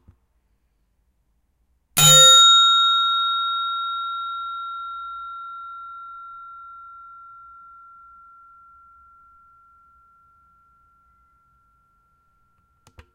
A close recording of an recepcion bell that made the mics of my Zoom H4n get a strange resonance